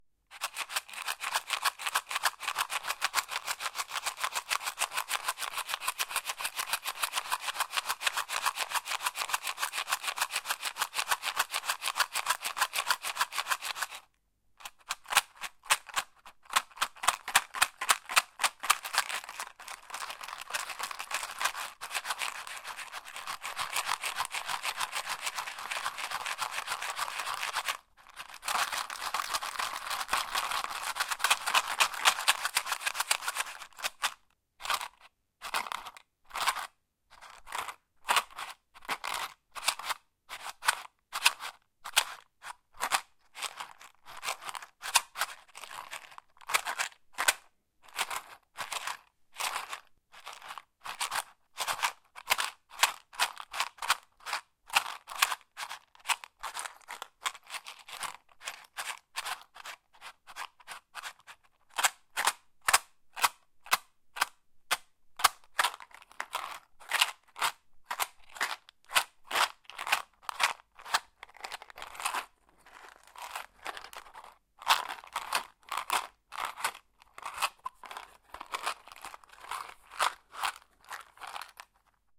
Matchbox shaking-20120410-024934
Matchbox shaking and tapping. Recorded with Tascam DR-40 internal mic Stereo X-Y.